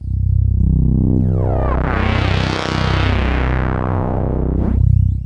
Sounds from an analog sound device called 'The Benjolin' a DIY project by Rob Hordijk and Joker Nies. Sometimes recorded in addition with effects coming from a Korg Kaoss Pad.
analog, benjolin, circuit, electronic, hardware, noise, sound, synth